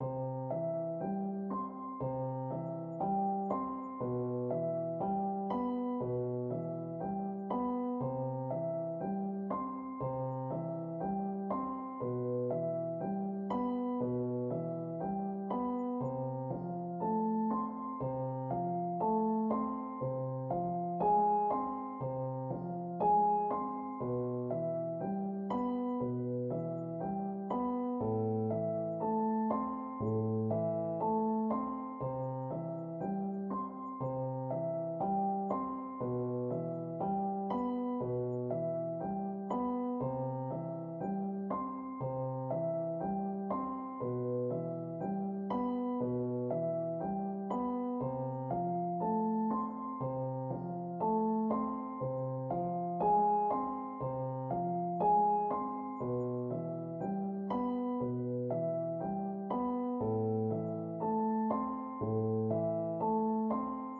Dark loops 201 only piano short loop 60 bpm
This sound can be combined with other sounds in the pack. Otherwise, it is well usable up to 60 bpm.
loops, bass, dark, loop, bpm, 60bpm, 60, piano